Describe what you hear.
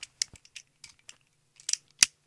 used a swinging can opener to mimic the sound of someone loading a gun
gun, holster, loading, can-opener, cocking